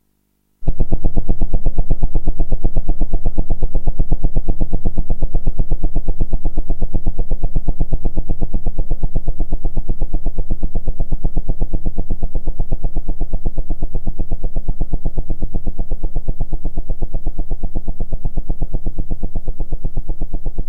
spaceship 2 sharp
This sound is generated by an 80's synthesizer ensoniq sq1 plus which memory banks have gone bad. I recorded the sound because I thought that it would be excellent as a creepy sci-fi spaceship sound
artificial, creepy, drone, engine, horror, scary, sci-fi, scifi, space, spaceship, submarine